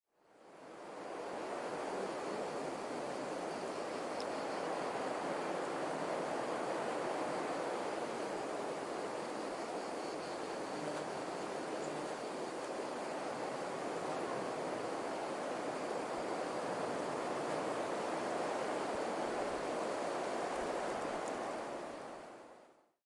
Ambiance of wind in trees during a summer day in South of France. Sound recorded with a ZOOM H4N Pro. Sound recorded with a ZOOM H4N Pro and a Rycote Mini Wind Screen.
Ambiance de vent dans les arbres lors d’une journée d’été dans le sud de la France (Vaucluse). Son enregistré avec un ZOOM H4N Pro et une bonnette Rycote Mini Wind Screen.
ambiance, ambience, ambient, blowing, branche, branches, breeze, field-recording, forest, general-noise, leaf, leaves, nature, nature-sound, summer, tree, trees, vent, wind, windy